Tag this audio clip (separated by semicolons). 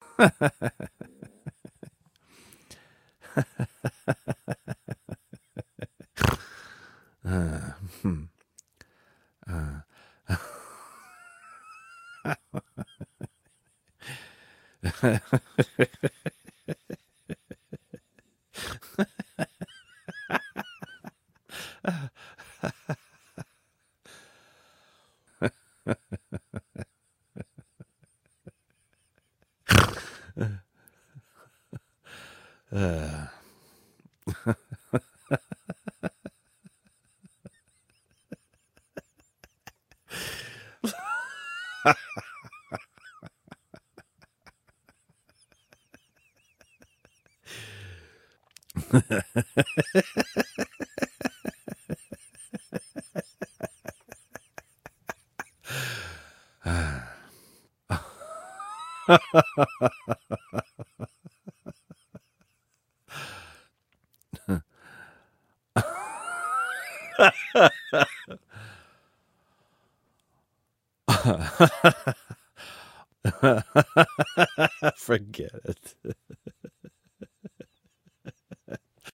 Guffaw; Giggle; Laughing; Snicker